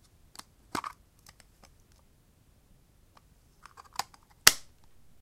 wuc frontglass open and close
Opening and closing the front glass of a wind-up clock. Manual system.
front-glass, wind-up-clock, clock, wind-up